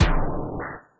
Snare drum generated and processed on PC.